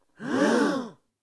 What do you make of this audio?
A crowd is scared or terrified of a situation.